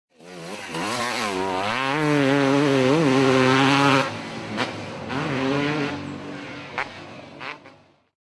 YZ250-turn1
yz250 turning on mx track
motorcycle, motorbike, yz250, dirt-bike